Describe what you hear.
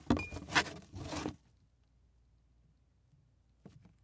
Box Of Bottles Close FF242
A box of glass bottles being closed. Glass on glass, tinging, box movement/sliding of wood.
box-closing, Glass-bottles, glass-movement